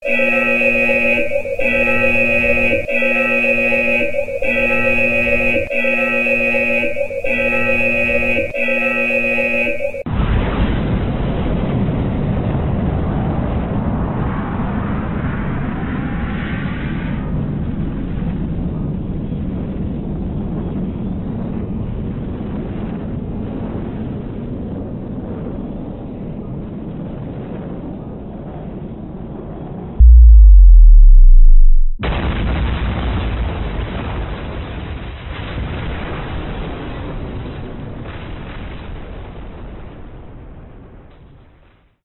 Nucular Bomb sequence 1
Bomb from alarm to launch to impact to aftermath.
Explosion, Nuclear, Bomb